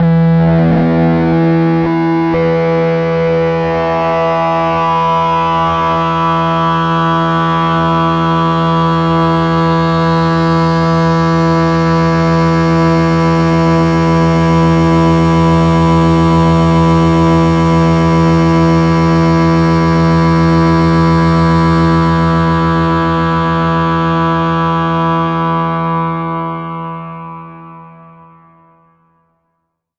THE REAL VIRUS 01 - HARD FILTER SWEEP LEAD DISTOLANIA - E3
THE REAL VIRUS 01 - HARD FILTER SWEEP LEAD DISTOLANIA is a multisample created with my Access Virus TI, a fabulously sounding synth! Is is a hard distorted sound with a filter sweep. An excellent lead sound. Quite harsh, not for sensitive people. Enjoy!
harsh, solo, hard, distorted, multi-sample, lead